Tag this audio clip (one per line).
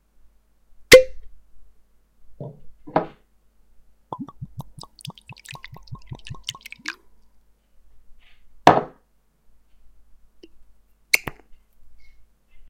alcohol
ardbeg
bottle
drink
drinks
glass
potion
whisky